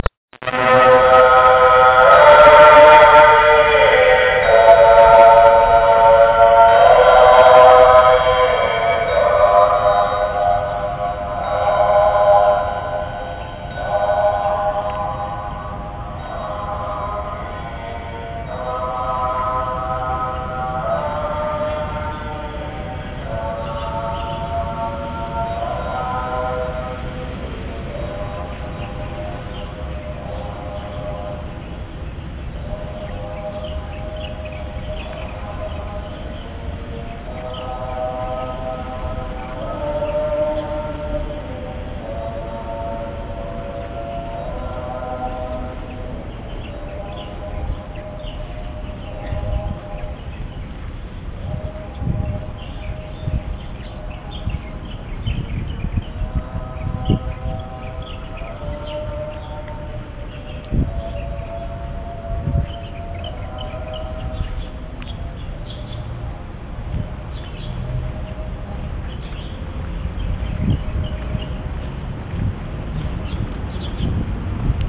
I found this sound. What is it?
Camera recording of what sounds like a dirge at the Chiang Kai Shek memorial.
dirge, taipei, taiwan